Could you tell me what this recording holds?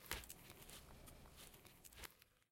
Fire Crackle 02
Fire Crackle Sound
flame, Fire